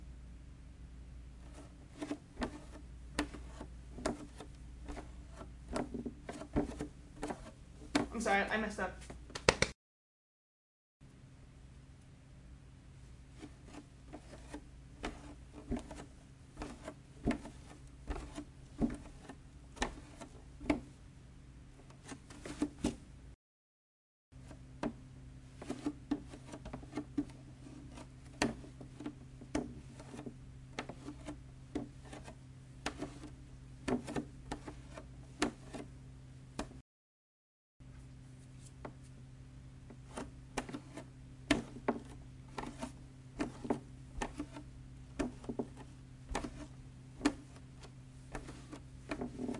Barefoot Walking Footsteps on Wood
Foley recording of a barefooted person walking on hardwood floors.
hardwood, barefeet, foot, bare-feet, barefoot, feet, step, creaky, walk, footsteps, foley, bare-foot, floor, squeaking, wood, steps